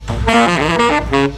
FND100-konk-zooben-saxophone
saxophone, tenor-sax, win